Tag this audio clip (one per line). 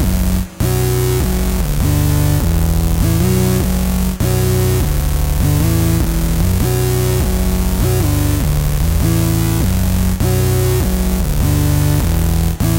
vst
lead
crystal